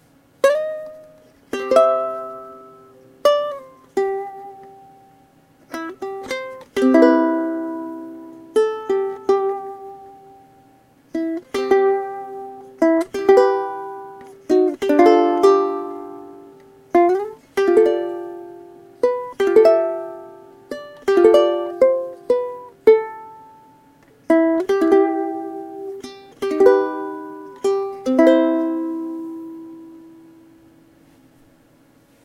A light ukulele piece. Composed and played by myself. Recorded in Audacity with a Samson C03U Condensor mic.